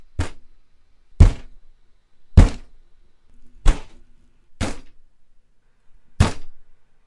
Banging a table
In the style of a Phoenix Wright/Ace Attorney character.
hard, banging, smacking, bang, loud, aggressive, hitting, hit, smack, whack, wood, table